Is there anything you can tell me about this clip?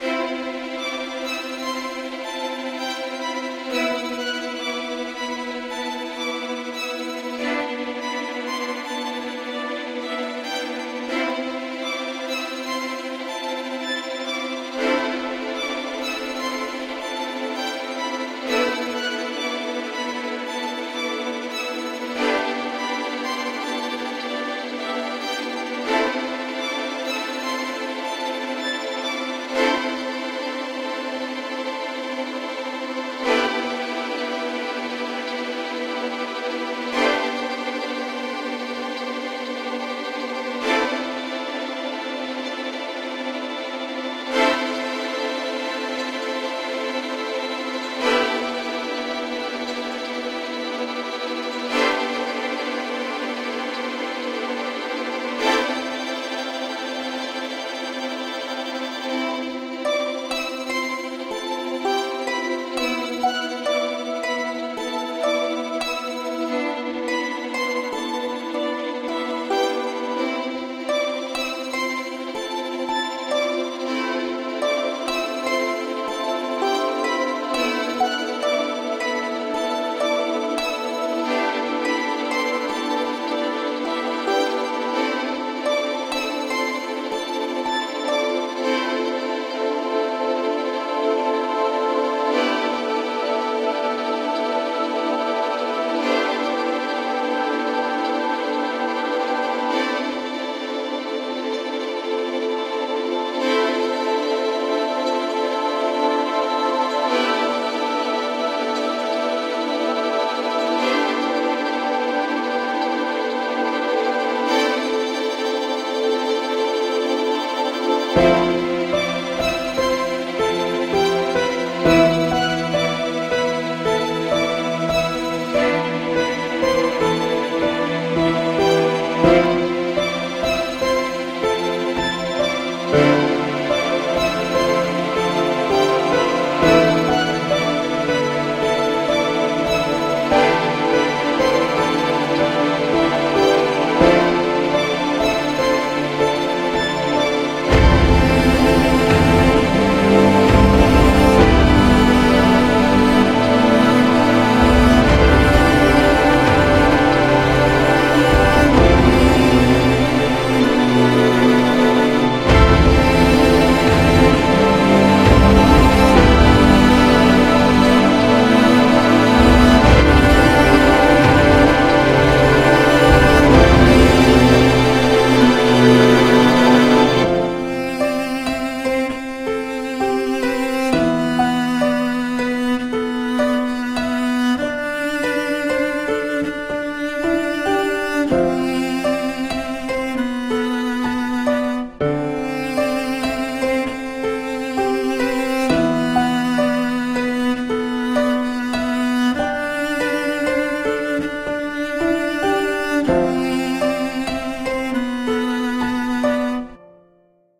Emotional Original Soundtrack - NO HOPE
An original composition I made in Fl Studio a while back. It's not perfect but I like it. Hope you like it :)
adventure, beautiful, movie, soundtrack, piano, original, epic, emotional, fantasy, strings, violin, dramatic, film, drama, cello